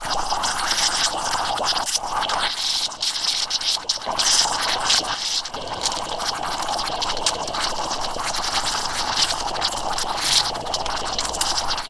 recording of milk frothing in a capuccino machine.